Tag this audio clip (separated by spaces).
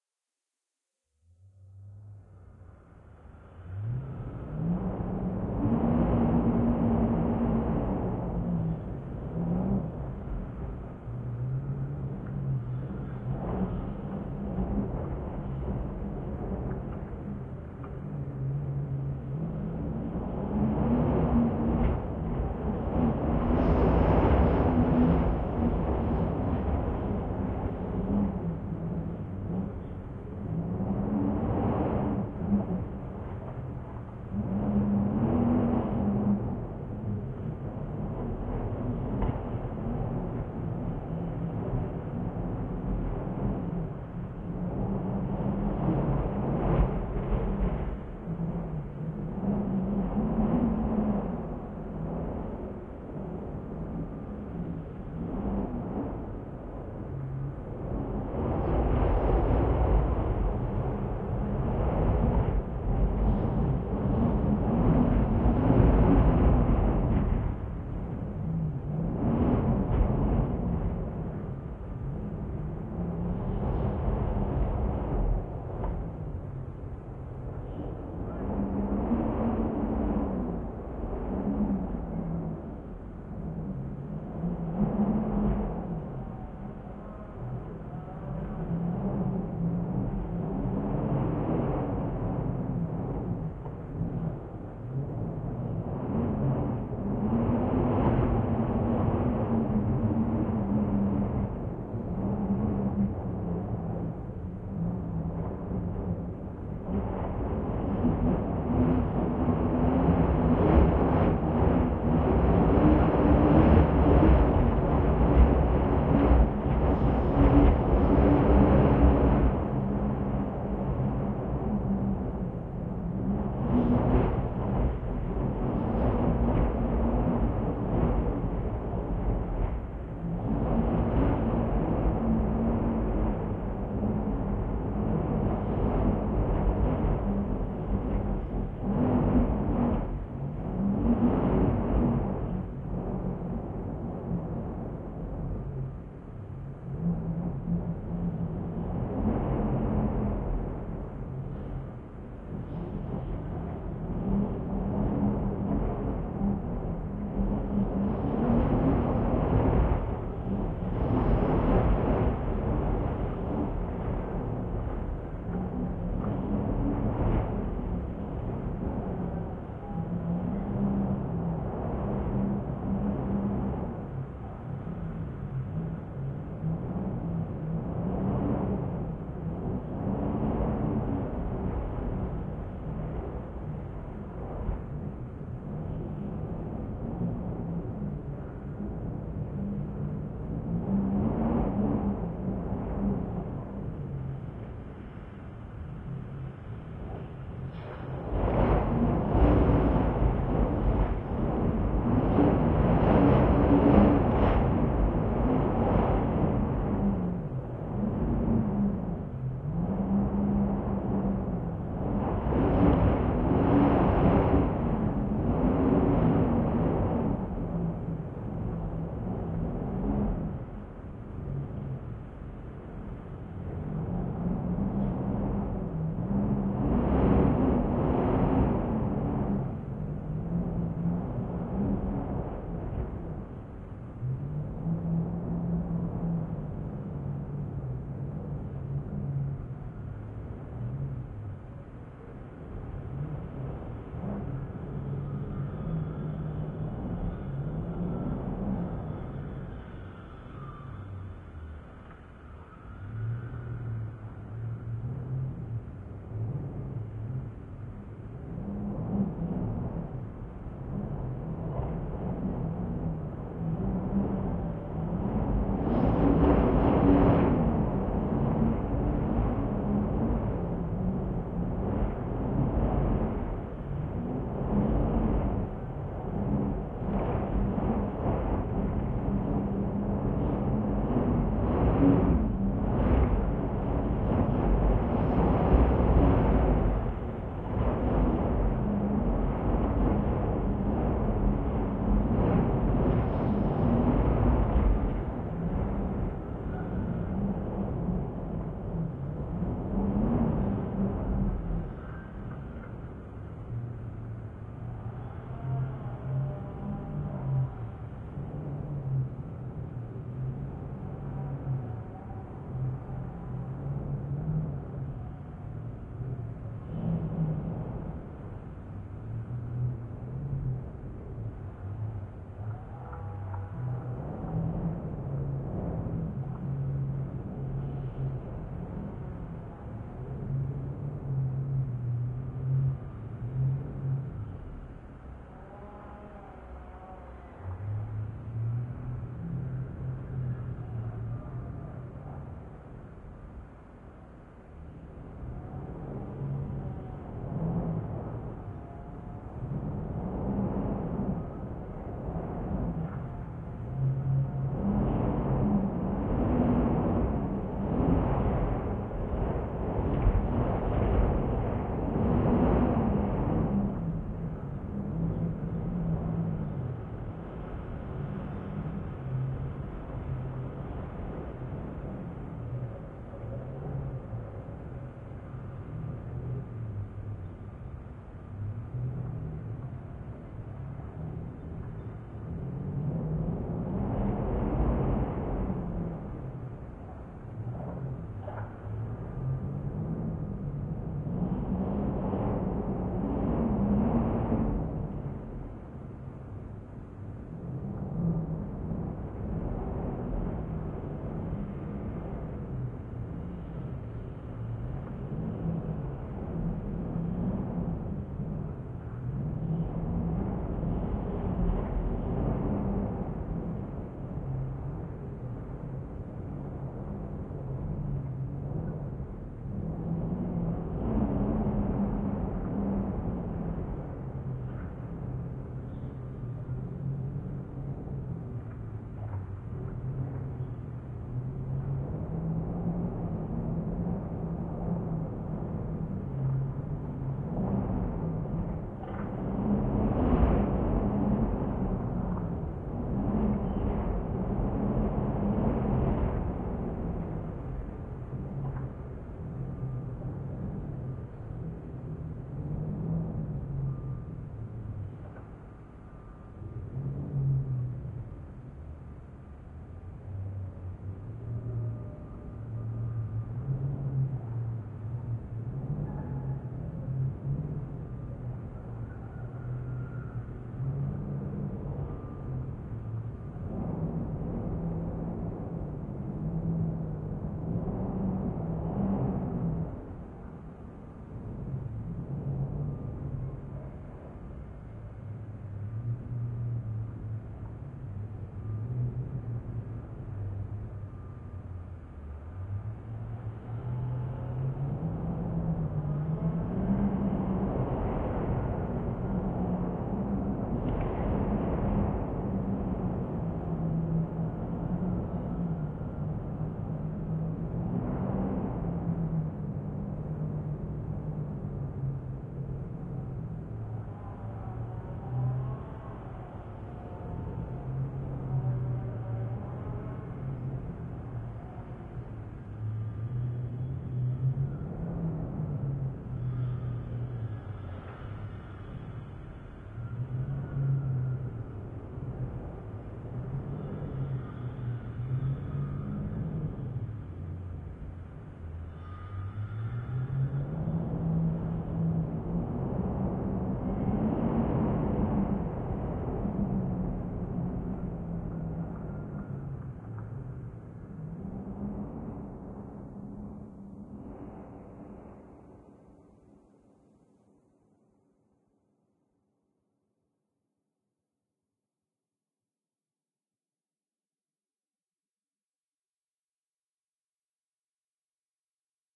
ambient EFX field-recording noise space-sound wind